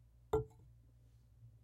A glass being set down on a table once. medium pitch.